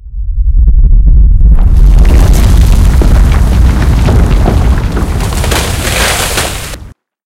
quake and break
an earthquake that ripples through from far away and then makes the roof of a house crack and cave in
Used Audacity to manipulate a couple different sounds to create the rumble of the earthquake. Then I added the cracking noise in the same program from a different effect (wood breaking).
debris, earthquake, roof-cave-in